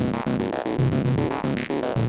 Stab-O-Gram 115
A few keyboard thingies. All my stuff loops fine, but the players here tend to not play them correctly.